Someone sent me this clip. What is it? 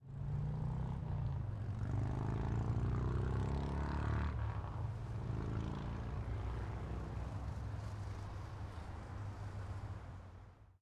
Motorcycle Pass 1
A motorcycle passing by with freeway traffic.
Engine, Freeway, Motorcycle, Pass, Passing, Traffic, Transportation